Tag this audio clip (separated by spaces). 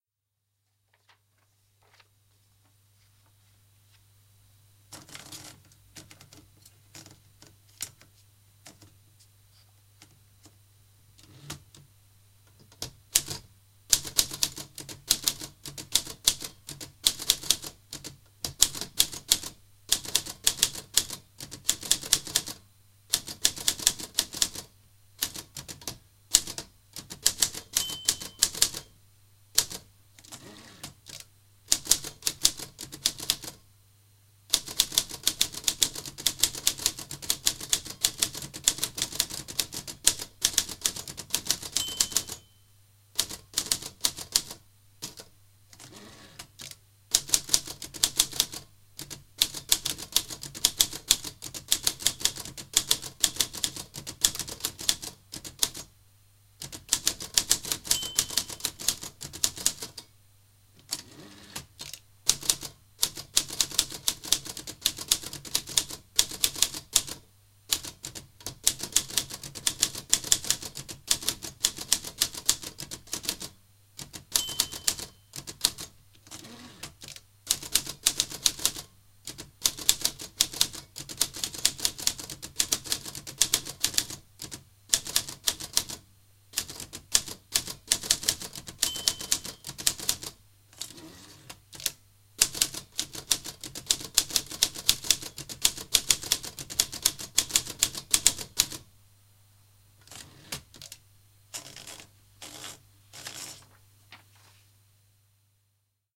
field-recording manual-typewriter olivetti-lettra-22 sound-effect typewriter